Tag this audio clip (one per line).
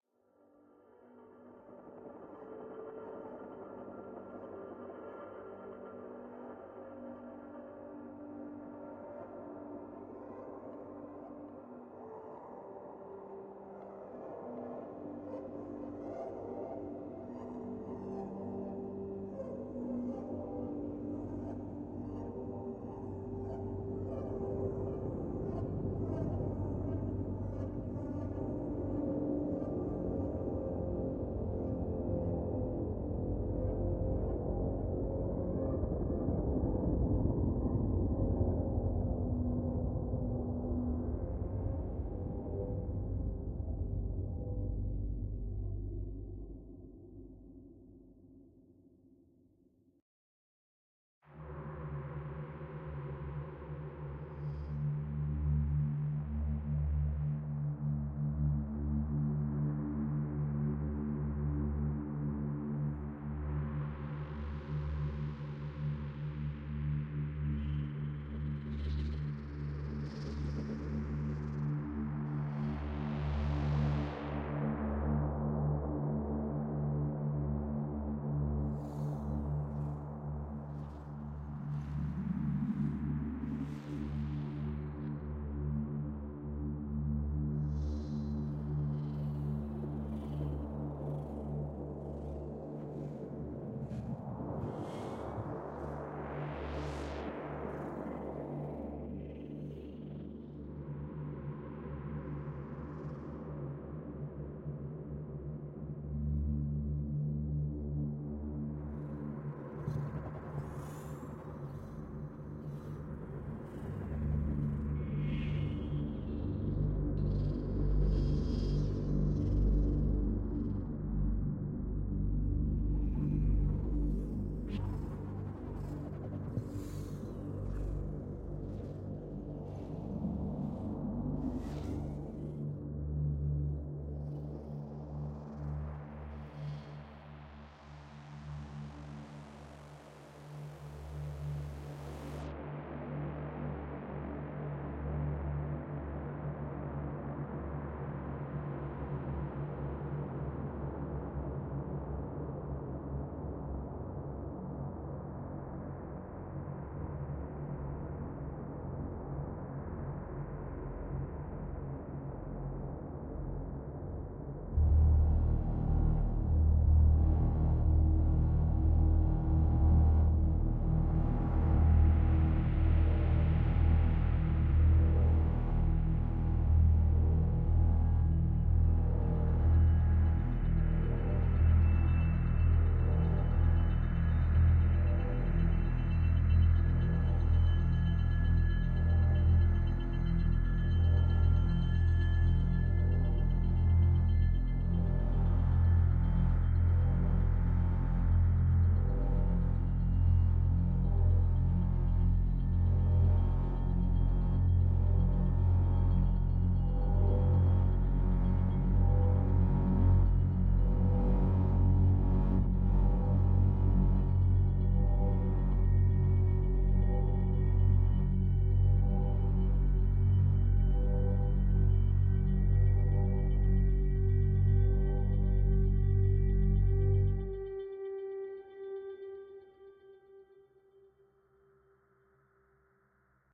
artificial
shock
sound
fiction
suspense
film
ambience
drone
sci-fi
soundscape
mystery
weird
crime
design
thrill
background
science
sinister
technical
atmosphere
ambient
tension